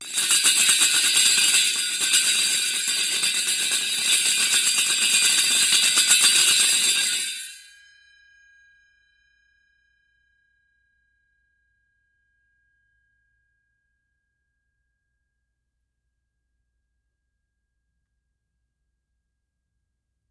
Bwana Kumala Ceng-Ceng 01
University of North Texas Gamelan Bwana Kumala Ceng-Ceng recording 1. Recorded in 2006.